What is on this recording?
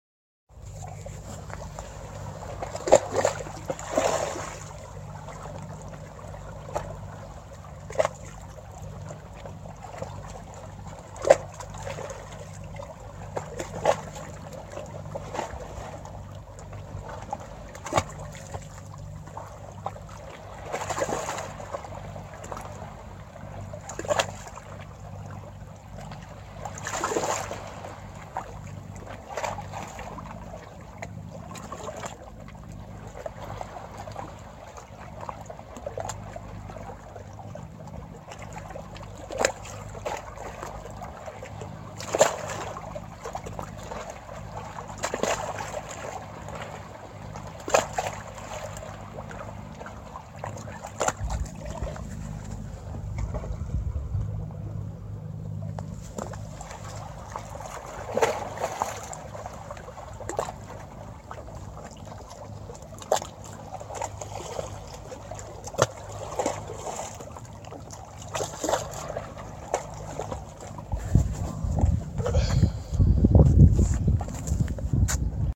sea smooth waves novigrad
soft waves adriatic sea on the beach
beach, coast, field-recording, nature, sea, water, waves